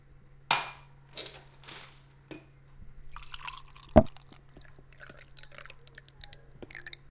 This sound is part of the sound creation that has to be done in the subject Sound Creation Lab in Pompeu Fabra university. It consists on the sound of pouring the organge juice from the jar to the glass.
pouring, UPF-CS14, liquid, pour, glass, orange, juice, drink, fill, jar